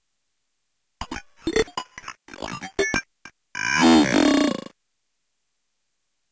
A short sample from a speak and spell toy that I've circuit bent, recorded into my computer via the headphone output of the toy.
toy, bent, circuit